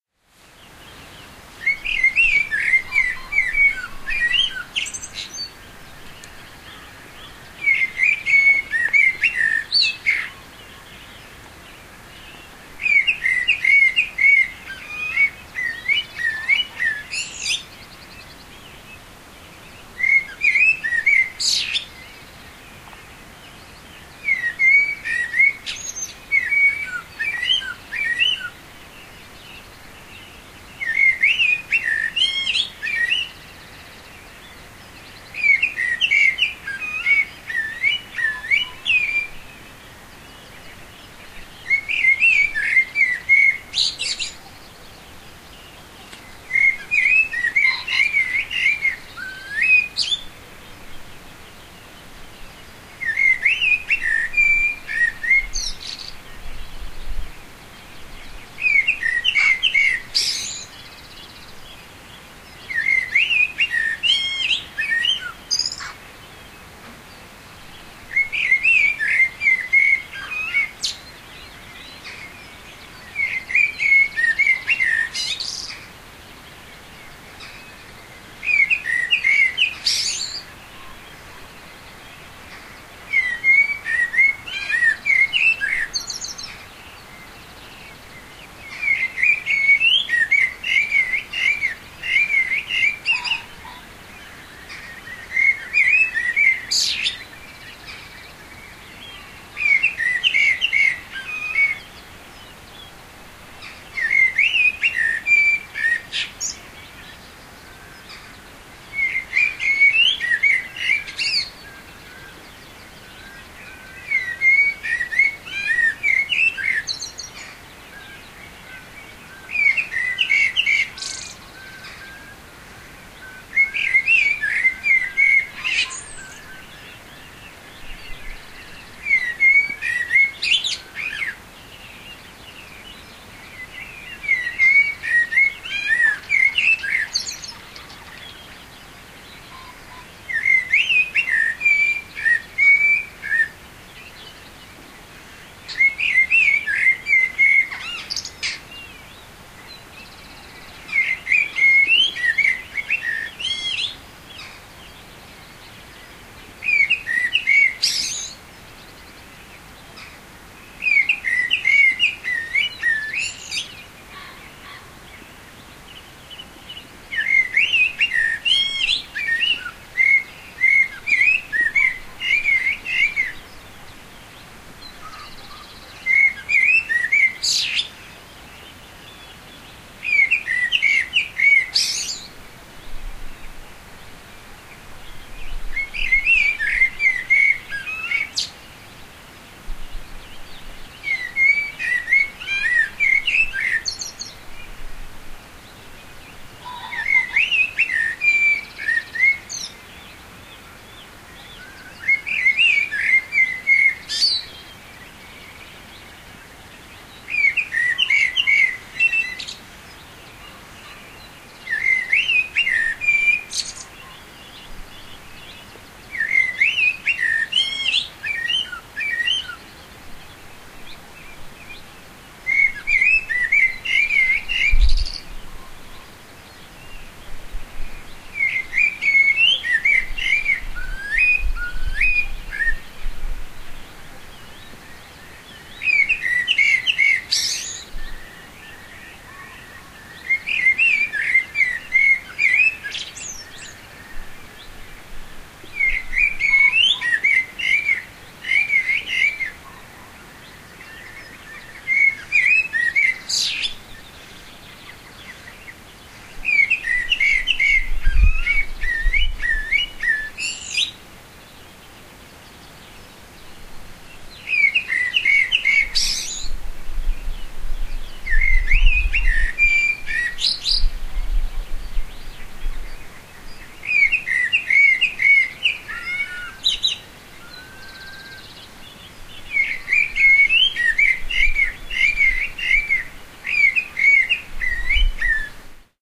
CW Thrush22May12
I've labelled this as a thrush, but I'm no ornithologist. I recorded it outside my house in Northumberland, England at 03:30am on 22nd May 2012, using an Olympus WS-560M digital recorder. You can hear a stream running faintly in the background and the odd pheasant call from across the valley.
Bird-song, Countryside, Dawn, Field-recording, Nature, Thrush